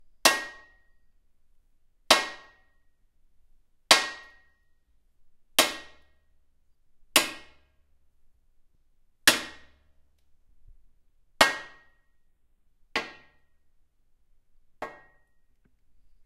Metal surface hit
Sound made by hitting an old metal lamp with a wooden handle.
clang, hit, impact, metal, metallic, percussion, strike, ting